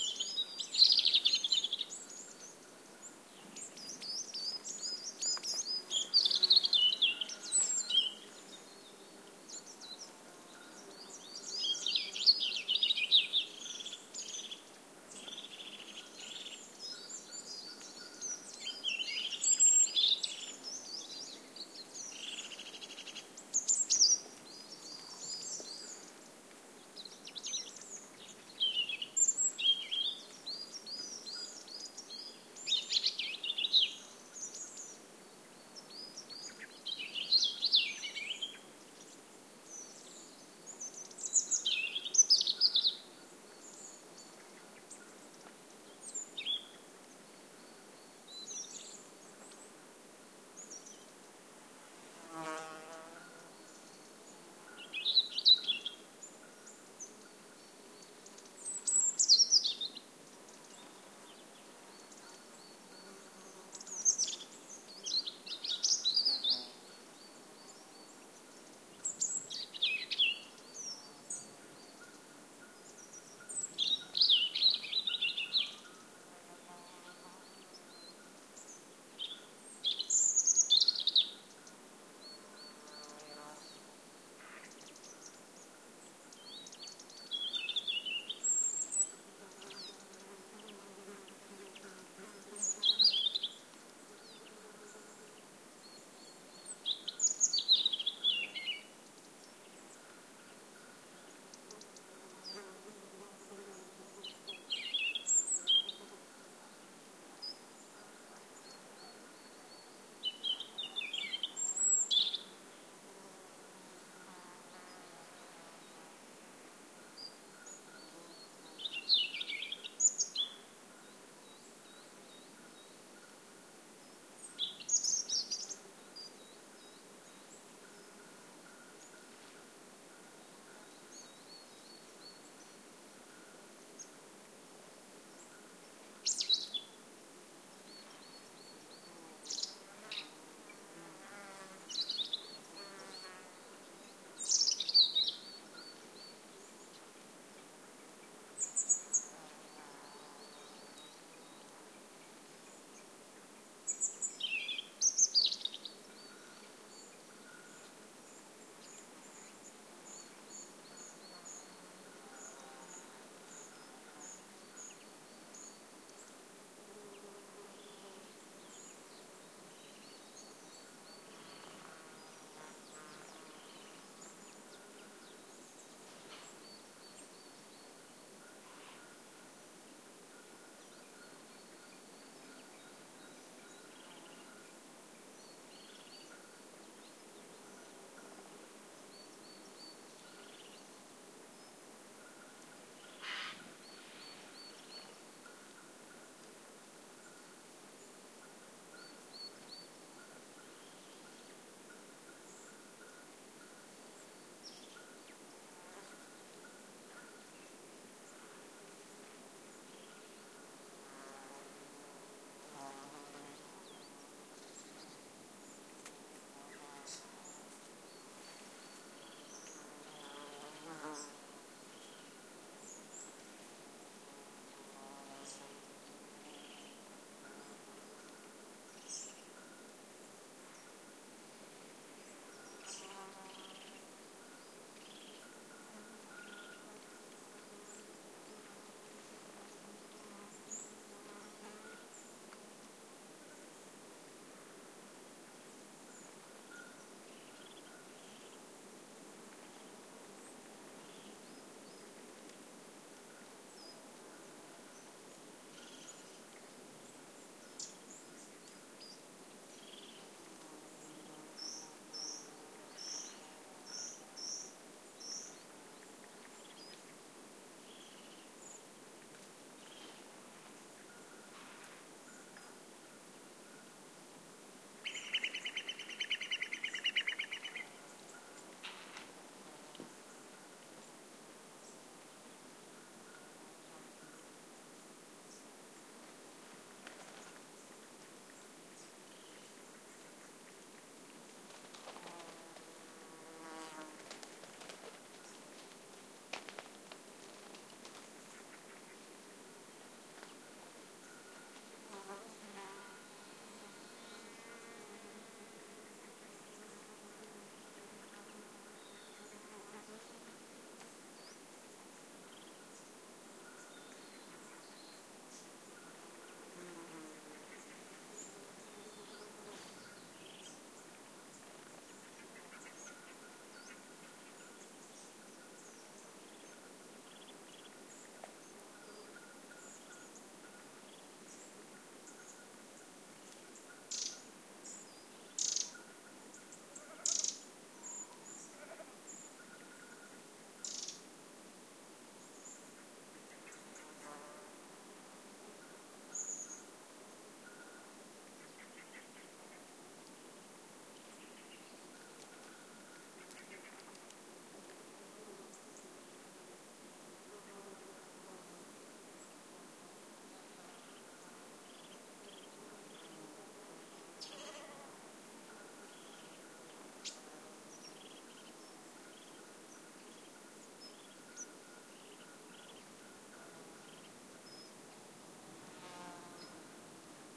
20151113 04.forest.bell
Natural ambiance with singing birds, buzzing insects, a distant sheep bell (you must pay atention). Recorded at 1000 m above the sea on Sierra de las Nieves Natural Park, near Ronda (S Spain), during an unusually warm autumn. Sennheiser MKH60 + MKH30 into Shure FP24 preamplifier, PCM M10 recorder. Decoded to Mid-side stereo with free Voxengo VST plugin
ambiance, autumn, birds, field-recording, forest, insects, mountains, nature, south-spain